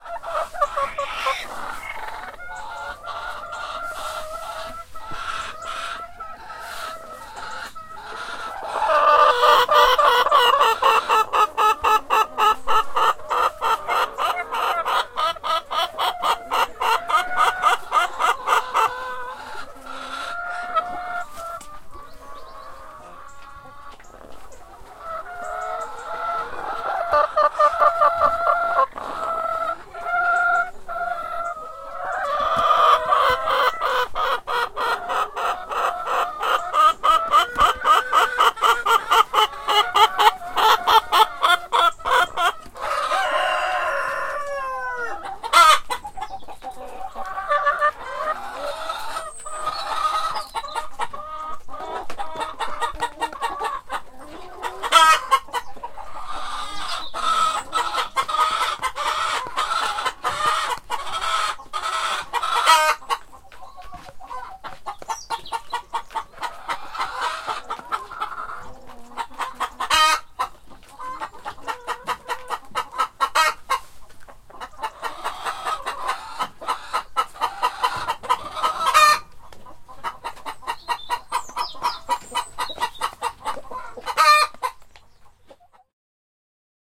Chicken close
Stereo field recording inside a chicken house. Some got very close. Excited clucking, some rooster crowing.
Recorded with Tascam DR 40
field-recording, picking, chicken, rooster, farm, chickens, hens, clucking, close, ambience, atmosphere